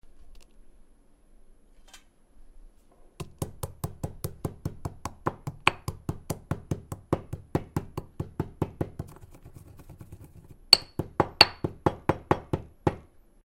Mortar and pestle cooking; loud
Grinding food with mortar and pestle.
chef, pestle, cook, cooking, preparing, food, pounding, mortar, percussion